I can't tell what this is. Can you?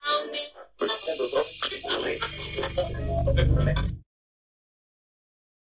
radio shudders6x
shudder, radio, sound-effect, grm-tools